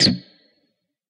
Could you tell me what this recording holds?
Dist Pick Cherp5
A pick slide scrape down the strings but as fast as a strum.
distorted-guitar, extras, guitar, miscellaneous